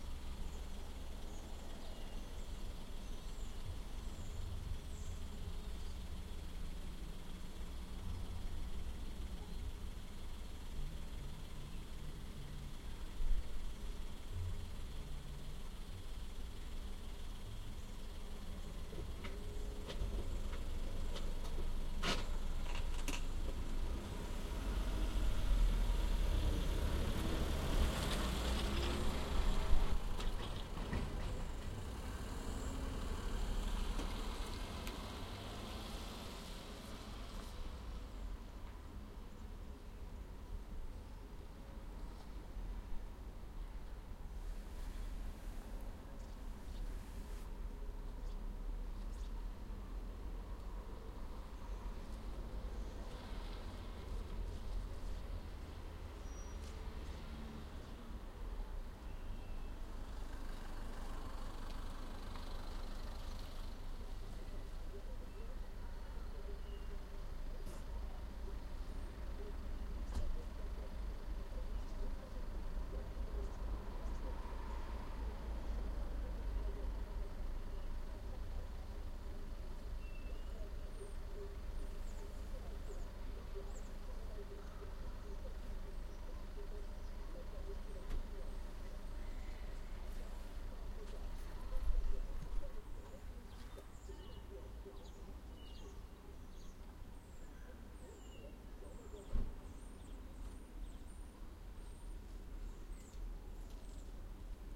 I am in my car in a parking lot the window on my side is open, we hear a car that starts, stays a bit and leaves. there is music inside. Noise of cars passing on the road. Sound of bird and wind.
parking, birds, car, outdoor, noises, inmycar